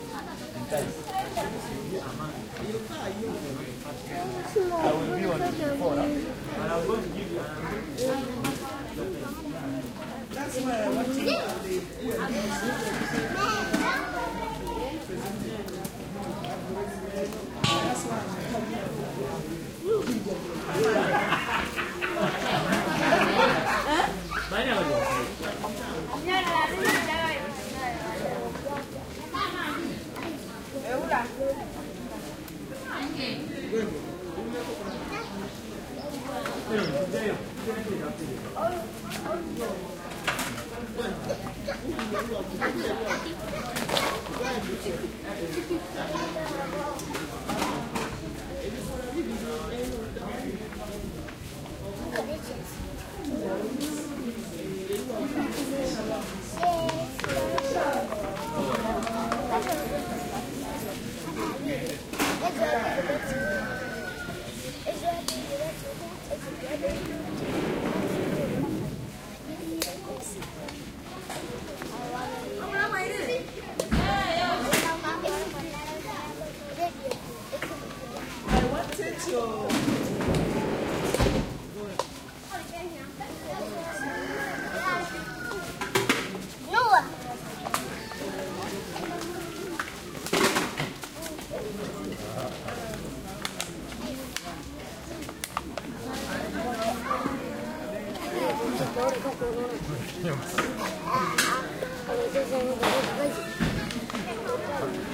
village morning mellow close activity voices kids chickens steps Uganda, Africa
chickens, morning, steps, voices